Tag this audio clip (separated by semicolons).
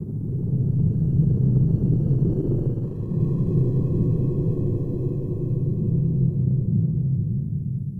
airy; breath; breathing; drone